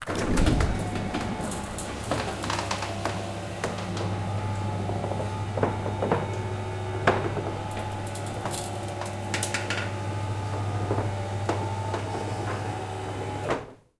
Electric Roller Door UP
This is an electric roller door going up. This is a stereo recording using a Rode NT-4 connected to the mic in of an Edirol R-09 made inside a 6m x 6m garage.